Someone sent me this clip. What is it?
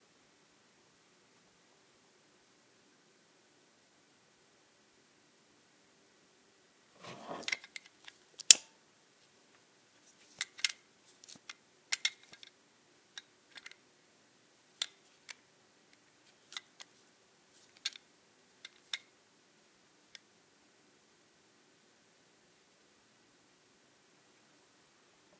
Picking Up Flashlight
The sound of someone picking up, and switching on an old metal flashlight.
light, picking, torch